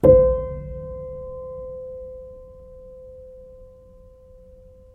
Upright Piano Noise 10 [RAW]
Some raw and dirty random samples of a small, out of tune Yamaha Pianino (upright piano) at a friends flat.
There's noise of my laptop and there even might be some traffic noise in the background.
Also no string scratching etc. in this pack.
Nevertheless I thought it might be better to share the samples, than to have them just rot on a drive.
I suggest throwing them into your software or hardware sampler of choice, manipulate them and listen what you come up with.
Cut in ocenaudio.
No noise-reduction or other processing has been applied.
Enjoy ;-)